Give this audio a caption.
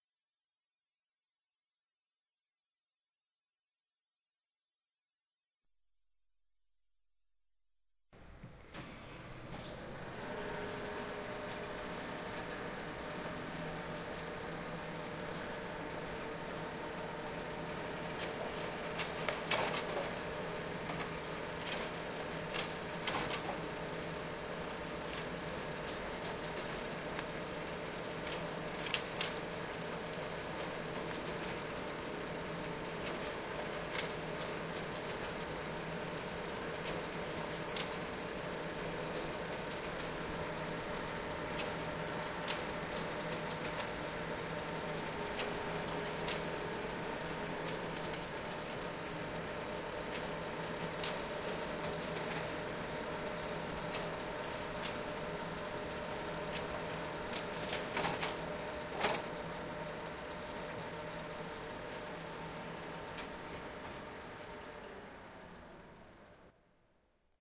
Total process of a printer machine operating. Recorded with a Zoom H2. Recorded at Campus Upf library.